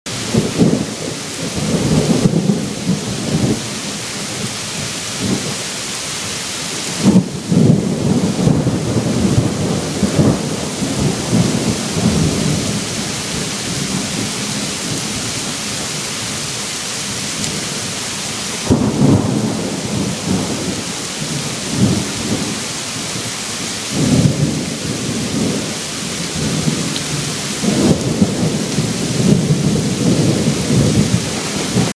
A heavy thunderstorm outside my house. Recorded with an iPod touch.
Rain, Lightning, naturesounds, Thunder, Weather, Hail, Nature, Thunderstorm, Storm, Loud